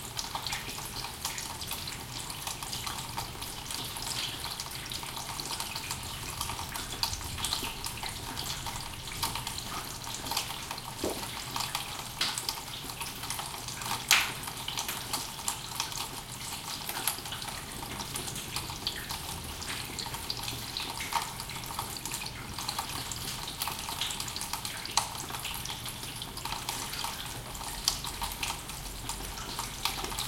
rain in pipes
rain streaming in pipes (basement)
pipes, rain, water, field-recording, nature, stream